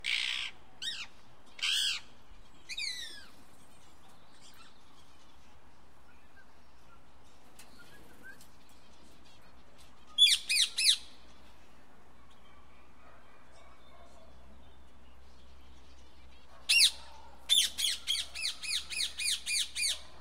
Exotic Birds 02
Unspecified exotic bird calls. Recorded at an enclosure in Jesmond Dene, Newcastle upon Tyne, UK. September 2016.
UK, England, Birds, Newcastle, upon, Jesmond-Dene, Tyne, Exotic